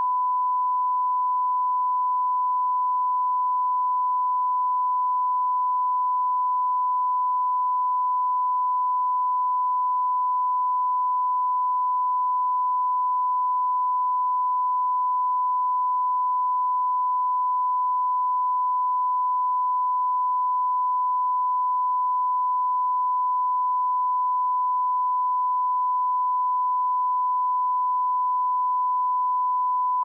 1kHz @ -20dBFS 30 Second
a 1000Hz -18dBFS 30 second line up tone
US
LINE, UP, TONE, HZ